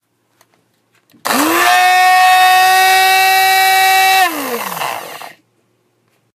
This is the sound of a blender.
appliance, appliances, blender, field-recording